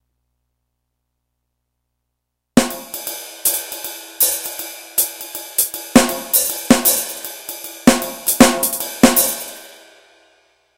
jazz beat using an SPD-20